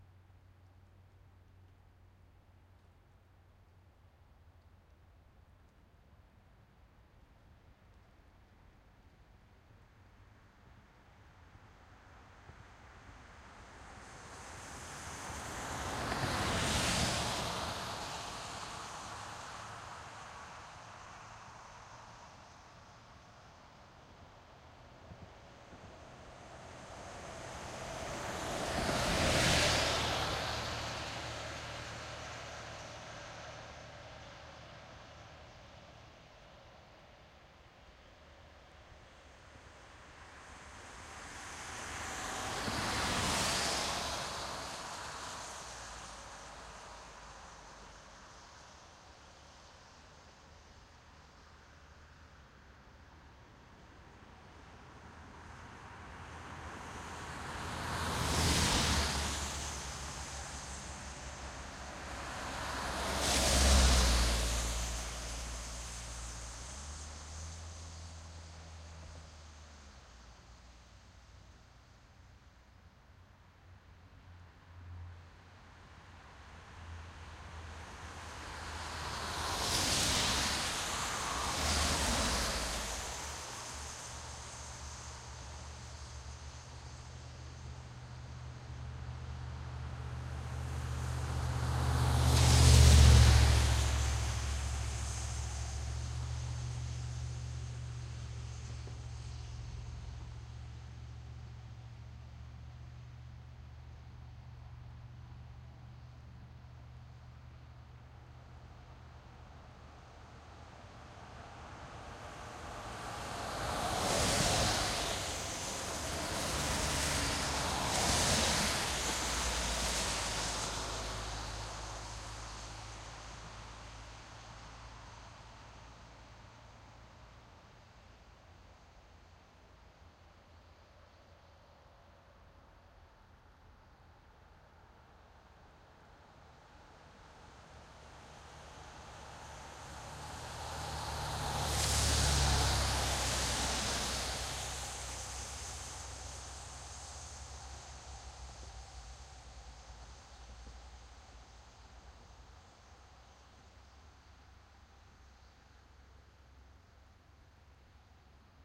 Zsd Long Wet Car Bys Schuylkill County FSP4824
I believe I recorded these with a Sound Devices 702 and a Neumann RSM 191 Stereo Shotgun. They are long car bys on a quiet road. Lots of distance to my left and right so you get some nice long singular car bys. Maybe one or two instances with a multi car pass. The roads were wet when this recording was done.